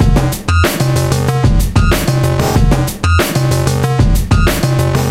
manneken + kinch 2
vst slicex combination + manneken sequence + dbglitch effect
beat, hiphop, loops, experimental, drumloops, hip, idm, drumloop, drums, electronica, loop, drum, drum-loop, beats, hop